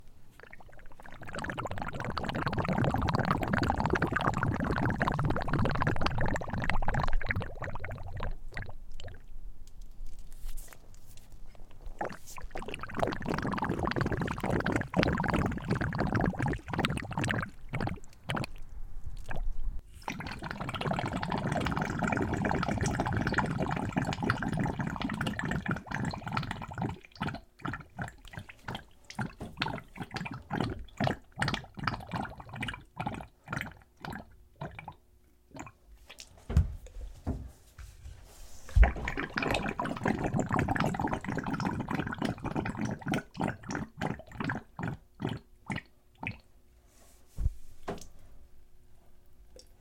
Gurgling Sound - Long,Wet,Gross
The hose on the back of my house makes a nasty gurgling sound when you turn off the water and drain the hose, so I recorded it for a game project. Kam i2 into a Zoom H4N.
gross, gurgle, gurgling, hose, liquid, nasty, trickle, water